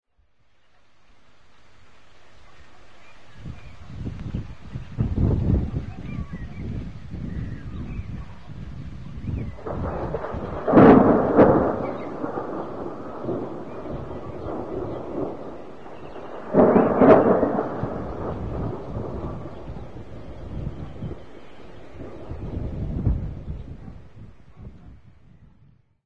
Two thunderclaps. This sound recorded by a Grundig Cassette Recorder and a PHILIPS microphone by the window of my room in Pécel, Hungary (denoised).
storm, thunderstorm